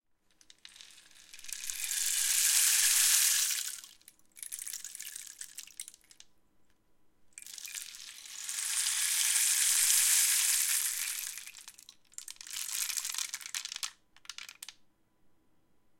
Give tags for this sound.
rainstick raining

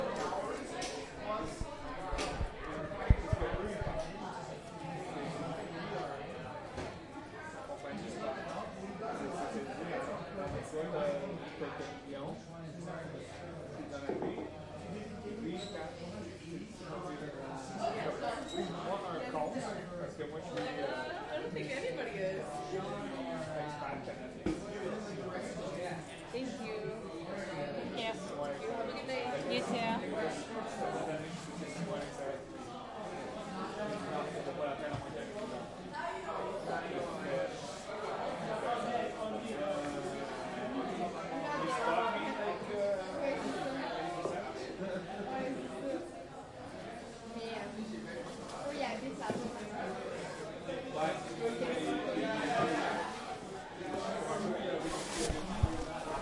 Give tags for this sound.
cafe montreal din voices diner

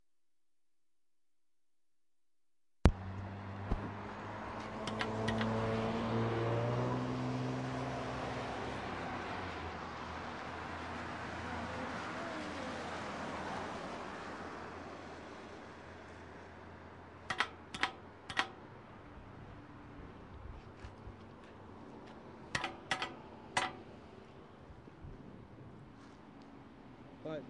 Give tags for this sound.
Field-Recording Intersection Wet

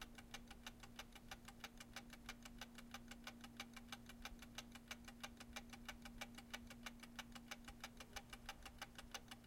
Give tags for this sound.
bathroom timer up wind fan

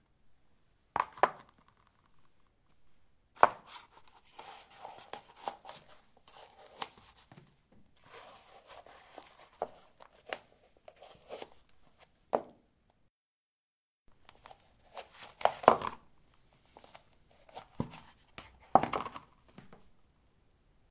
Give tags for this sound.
steps footsteps footstep

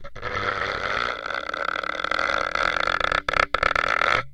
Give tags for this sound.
daxophone friction idiophone instrument wood

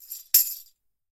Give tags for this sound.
chime chimes drum drums hand orchestral percussion percussive rhythm sticks tambour Tambourine

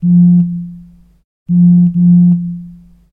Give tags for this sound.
Cell Cell-Phone handy mobile Mobile-Phone phone Text-Message Vibration Vibration-Alarm

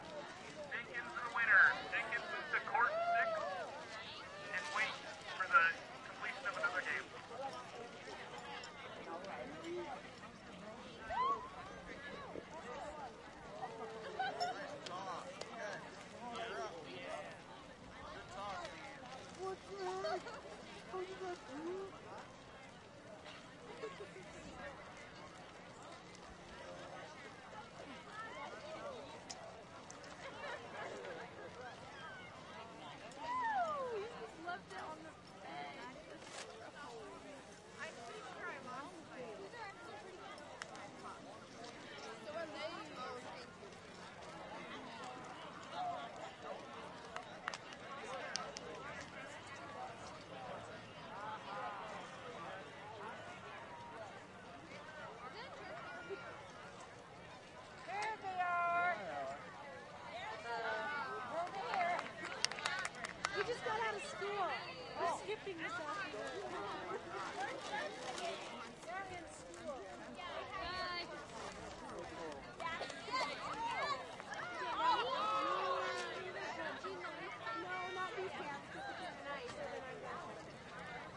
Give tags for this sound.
announcement bocce-ball megaphone sporting-events sports tournament

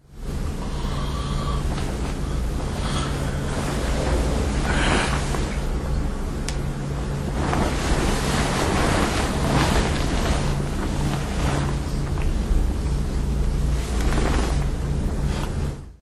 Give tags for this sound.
field-recording bed nature human body breath lofi household noise

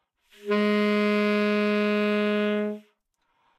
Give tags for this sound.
good-sounds Gsharp3 multisample neumann-U87 sax single-note tenor

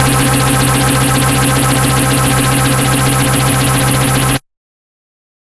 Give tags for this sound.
110 bass beat bpm club dance dub dub-step dubstep effect electro electronic lfo loop noise porn-core processed rave Skrillex sound sub synth synthesizer techno trance wah wobble wub

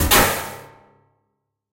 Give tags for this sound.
sfx sounddesign effect opening soundeffect door shutting closing fx sound

